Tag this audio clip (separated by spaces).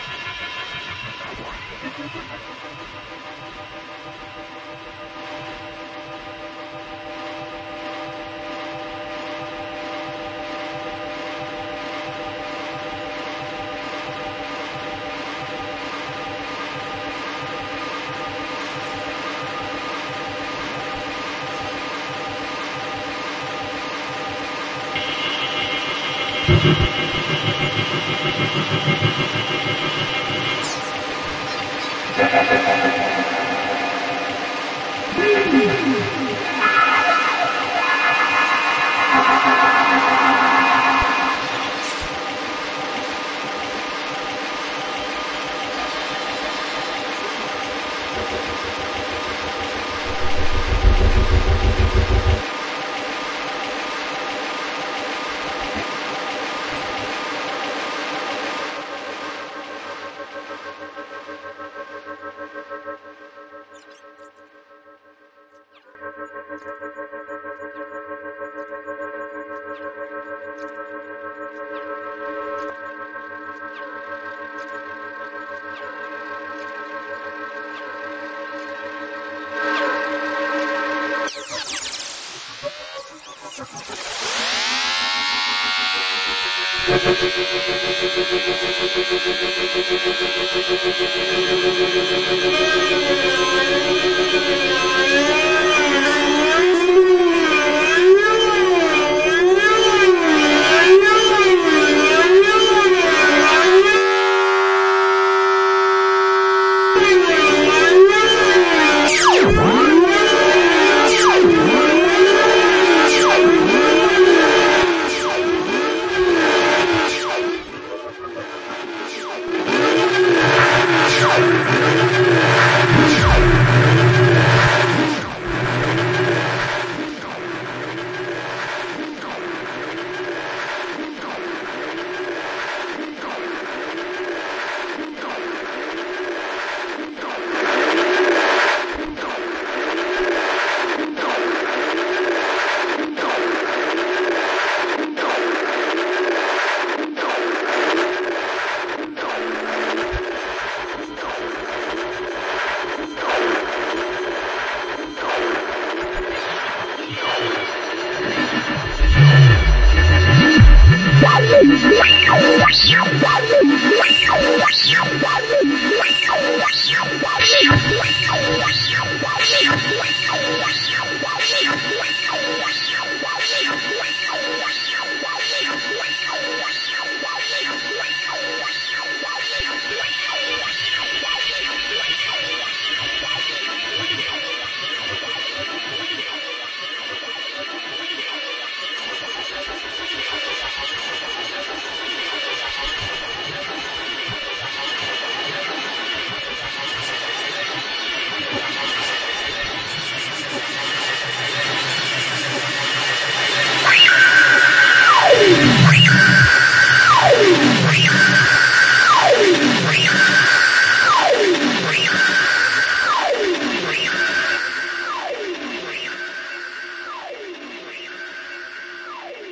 cry drone ghost metallic metallic-drone noise sound-design terror